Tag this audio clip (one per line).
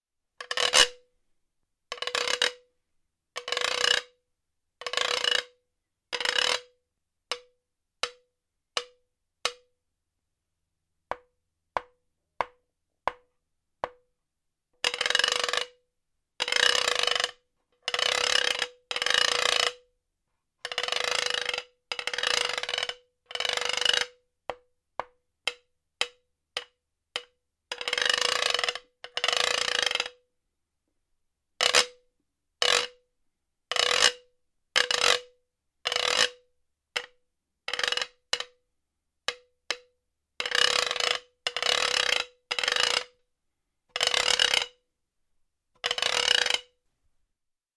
percussion
glass
flickr
marble